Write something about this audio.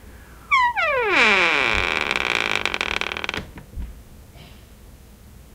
door close
close, door, foley